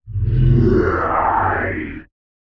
Sci-Fi Horror Voice
some kind of horror effect of unnatural human voice or scream
creepy, effect, fx, horror, scary, sfx, spooky, voice, weird